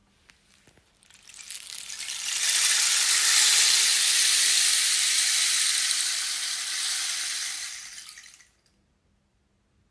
Musical instrument called "pau-da-chuva".
instrument, rain, sea